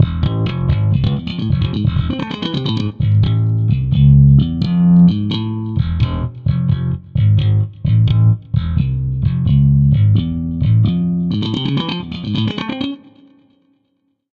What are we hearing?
The Sigil scale has 4 transpositions:
1. C, C♯, E, F, G♯, A
2. C♯, D, F, F♯, A, A♯
3. D, D♯, F♯, G, A♯, B
4. D♯, E, G, G♯, B, C
semitonal progression (steps forward from each previous position):
0, +1, +3, +1, +3, +1, +3
or
0, +3, +1, +3, +1, +3, +1
Mix the transpositions of the scale (submodes) and add blue notes (outside the scale).
Don't be extremely strict on the scale, neither extremely free. 98% of the time follow specific rules (namely use the subscales).
Use the Harley Benton PB-20 SBK Standard Series (all black) or the MODO BASS vst.